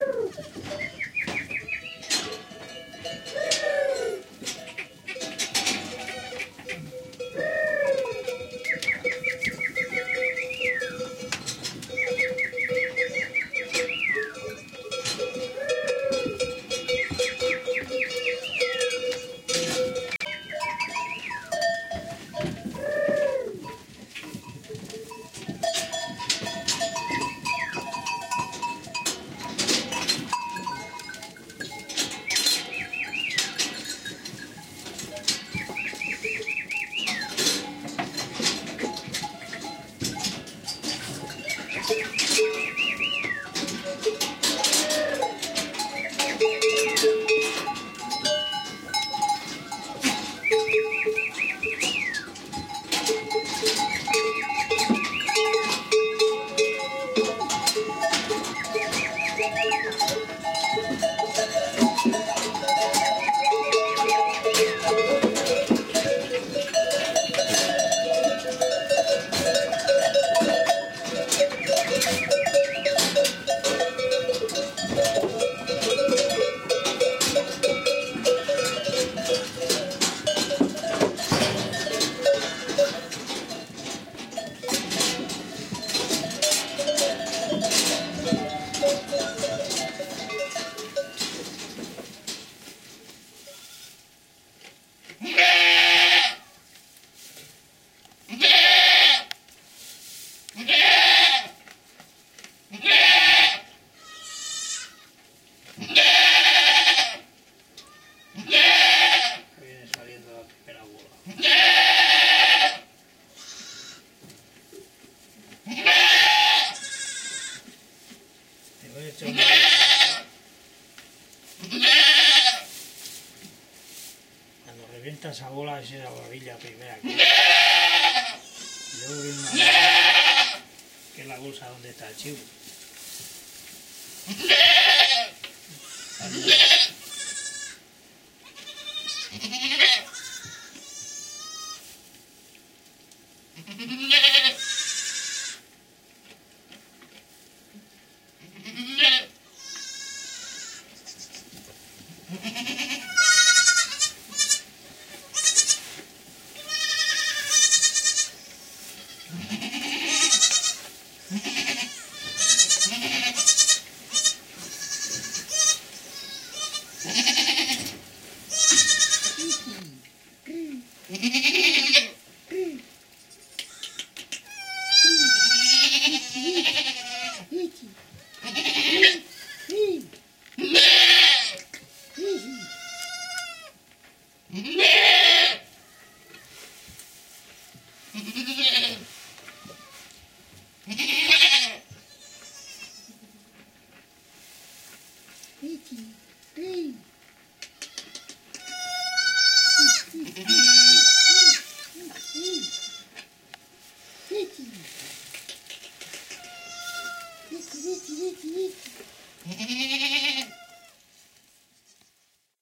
Nacimiento en el establo :: Birth in the stable
Salida de las cabras del establo, silbidos y sonidos del pastor. Queda dentro una cabra de parto y cabritillos. El pastor atiende a la cabra y al nuevo chivo.
Grabado el 01/11/14
Goats leaving the stable, whistles and sounds of the goatherd. Some little kids and a goat kidding remain inside. The goatherd attends the goat and the new kid.
Recorded on 01/11/14
animales, animals, balidos, bleating, cabras, goatherd, goats, grazing, oficios, pastoreo, Piedralaves, Spain, whistles